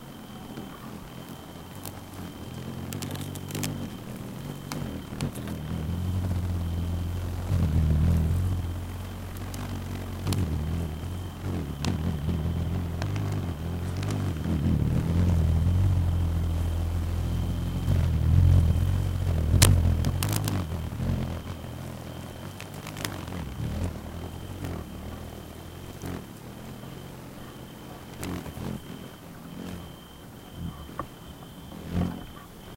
Low-pitched buzz of a hawkmoth (Hyles livornica) hovering, and the clicks it makes as his wings hit plant branches. Crickets in background. Sennheiser ME66 > Shure FP24 > iRiver (rockbox). Believe me when I say this sample was difficult to get / El zumbido de un Hyles livornica mientras vuela, y los clicks que hacen las alas al chocar con ramitas. Se escuchan grillos al fondo. Creo que es la cosa más difícil que he grabado en mi vida.